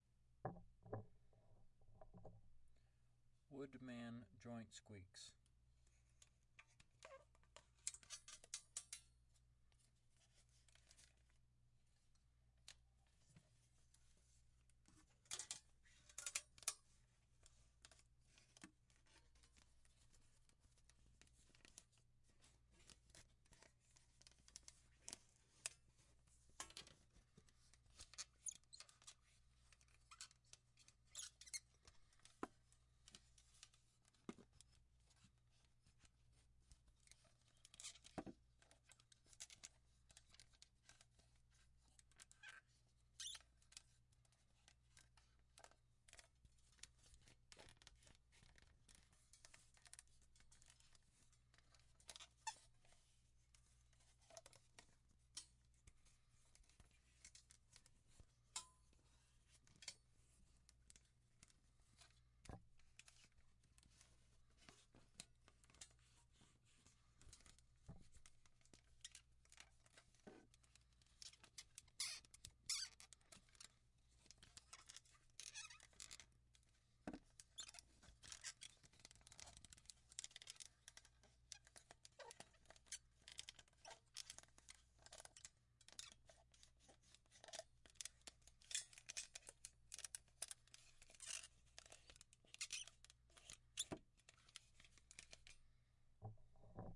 Joint Squeaks
The sound of a wooden art mannequin's joints moving around. Good for you moving creaky sound.
Creak, Foley, Rubbing, Spring, Stop-Motion, Wooden